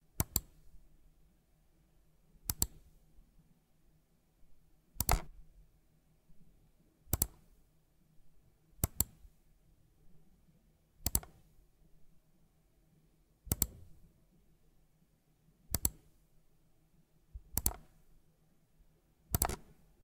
Some variations of mouse click sounds